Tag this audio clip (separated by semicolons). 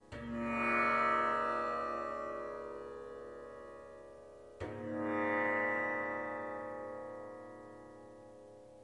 ethnic; indian